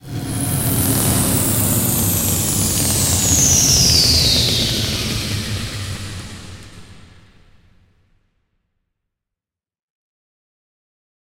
landing reverb
A landing effect obtained by using a Bicycle wheel
Requests about Original music or custom sound design packs
shuttle, space, moon, landing